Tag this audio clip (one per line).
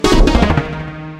sfx
effect
application
click
bleep
blip
intros
intro
sound
desktop
clicks
game
bootup
event
startup